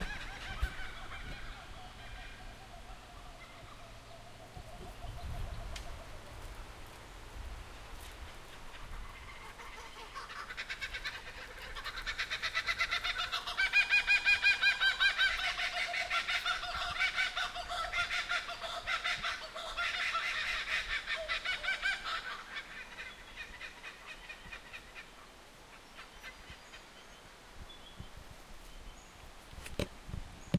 Kookaburras in the Victorian Bush
Kookaburras recorded in the Cathedral Ranges in Victoria, Australia.
Recorded on a Zoom H2N
bird, Australia, kookaburra, field-recording